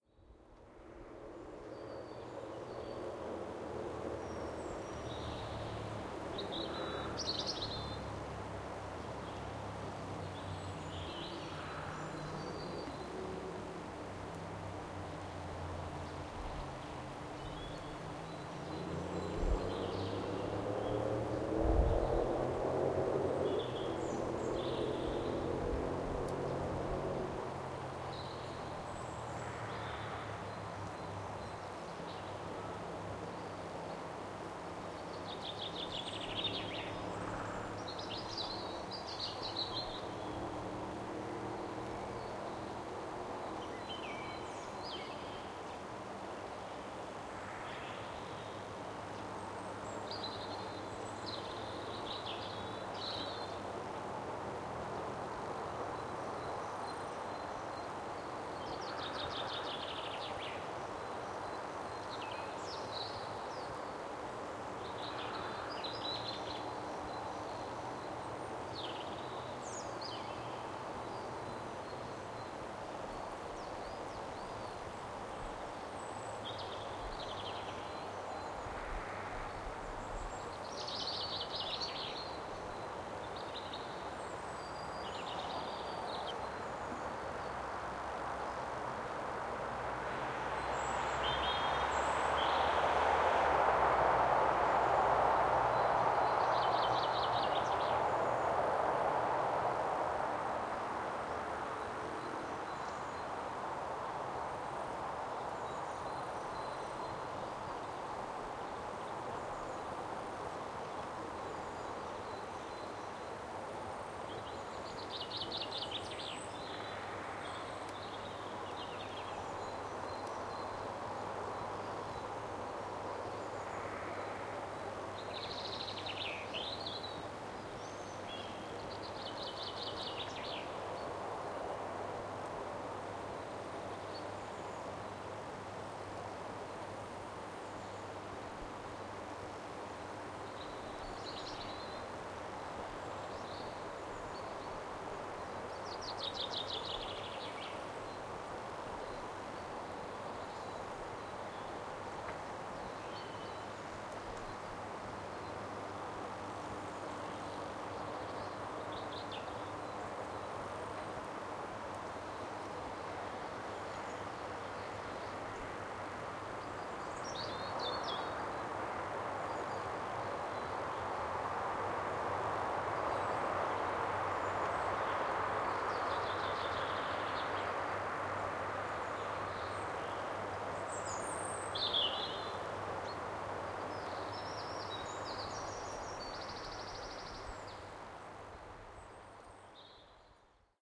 A 3 minutes recording taken on a side of Boleskine Power Station. you can hear quiet electricity hum and birdsong including distant woodpecker.
Stereo recording made using Zoom H1 recorder and edited using audacity.
birds, buzz, electricity, field-recording, hum, power, power-station, woodpecker